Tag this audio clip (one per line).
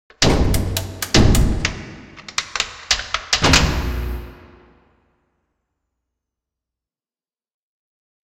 artificial
breaker
cinematic
circuit-breaker
effect
electric
film
fx
game
impact
lights
light-switch
machine
mechanical
movie
neon
projector
sfx
shutdown
sound-design
sounddesign
soundeffect
spotlight
starter
start-up
switch
toggle
tumbler-switch